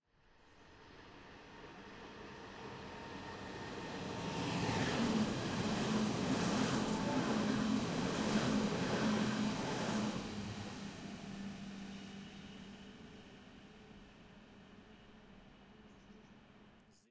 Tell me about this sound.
S-Bahn City Train Passing Close1
S-Bahn city train passing. As heared on the bridge above the railway tracks. Recorded in 90° XY with a Zoom HD2 at Priesterweg, Berlin, in September 2016